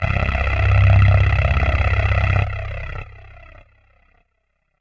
THE REAL VIRUS 03 - HYPER ANALOG SAW WITH CHORUS AND COMB FILTERING - C1
Two hyper saw oscillators with some high pass & low pass filtering, heavy analog settings, some delay, chorus and comb filtering. The result is a very useful lead sound. All done on my Virus TI. Sequencing done within Cubase 5, audio editing within Wavelab 6.
lead, multisample, saw